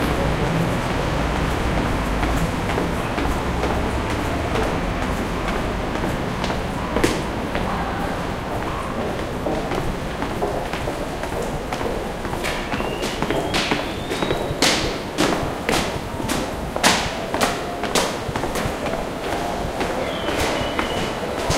Japan Tokyo Train Station Shinjuku Footsteps
One of the many field-recordings I made in train stations, on the platforms, and in moving trains, around Tokyo and Chiba prefectures.
October 2016. Most were made during evening or night time. Please browse this pack to listen to more recordings.
departure, rail, railway, tube, platform, metro, railway-station, train-station, station, announcement, beeps, public-transport, footsteps, underground, transport, subway, train-tracks, announcements, Japan, train-ride, arrival, train, field-recording, departing, depart, tram, Tokyo